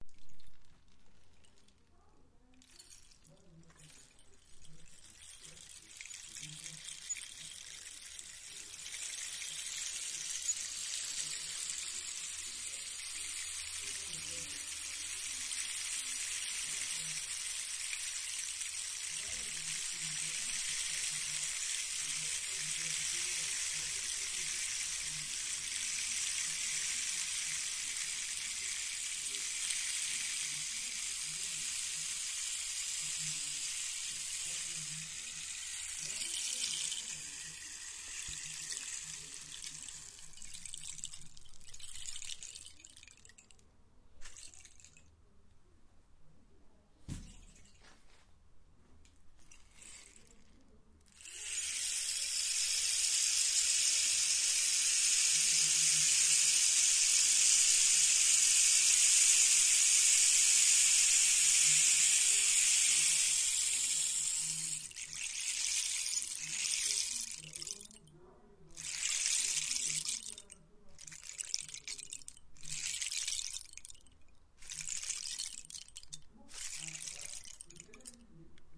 a rainstick recorded with m-audio microtrack.